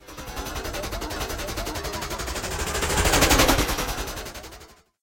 Remixed this with a few effects to get a sound needed for a game.